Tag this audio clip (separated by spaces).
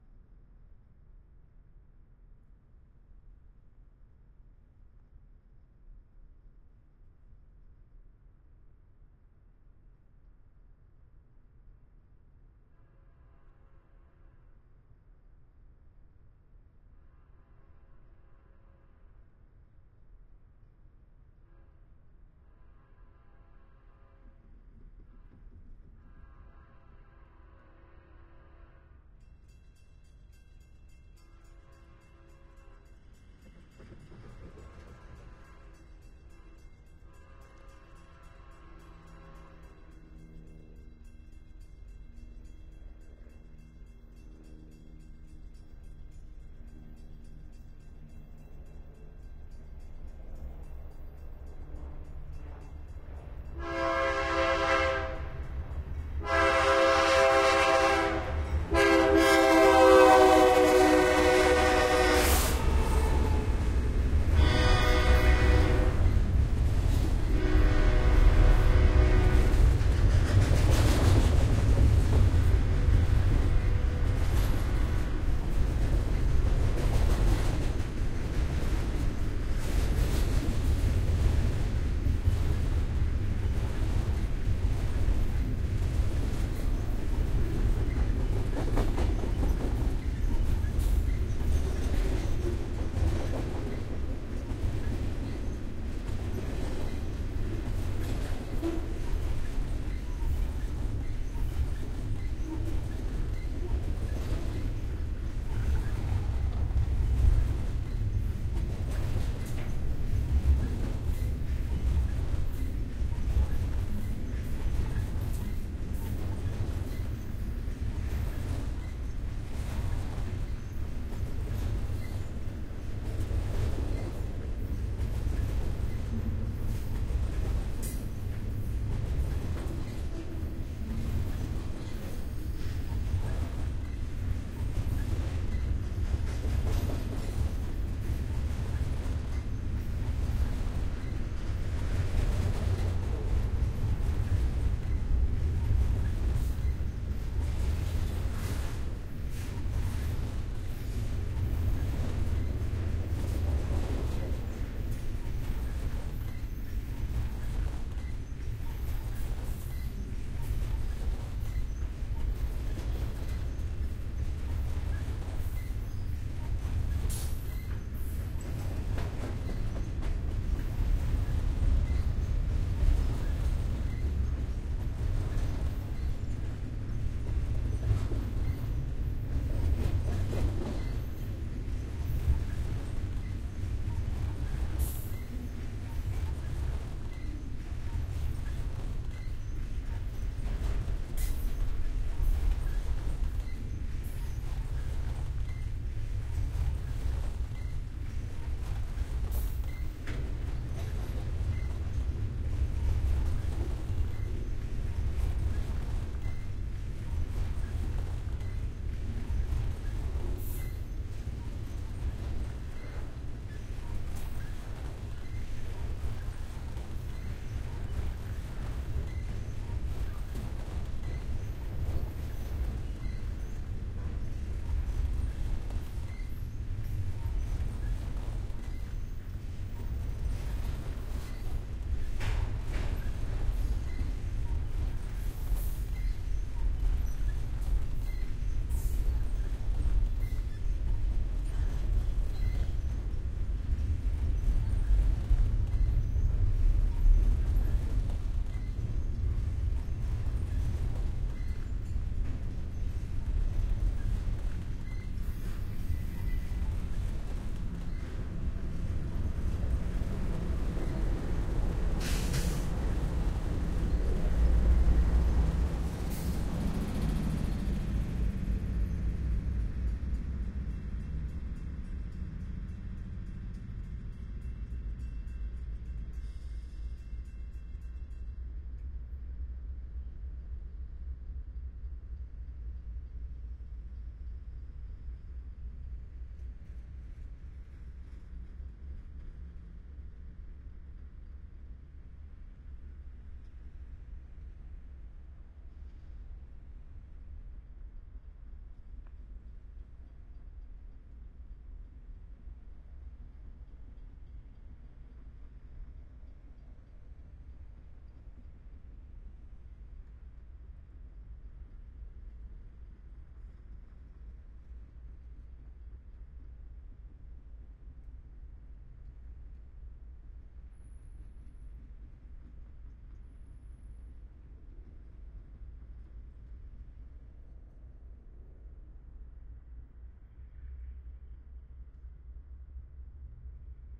freight audio-technica locomotive airhorn railroad jecklin field-recording diesel helicopter train horn